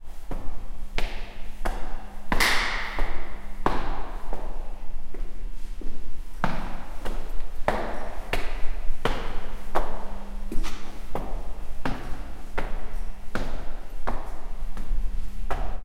Prison Locks and Doors 35 Footsteps up stairs
From a set of sounds I recorded at the abandoned derelict Shoreditch Police Station in London.
Recorded with a Zoom H1
Recorded in Summer 2011 by Robert Thomas
doors, latch, lock, locks, London, Police, Prison, scrape, Shoreditch, squeal, Station